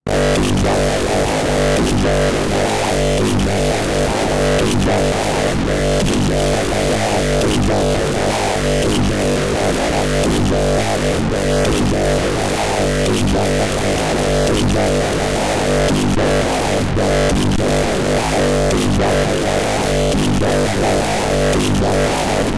Distorted Wah Growl 2
Heavy, fast paced modulated loop intended for Drum and Bass music. Created in Logic Pro X by adding a bunch of effects to a bassline and pushing them all way further than they were intended to be pushed.
growl, loops, Key-of-G, synth, modulated, Loop, synthesized, music, hard, 170BPM, dnb, 170-bpm, synthesizer, angry, mean, G, distorted, electronic, bass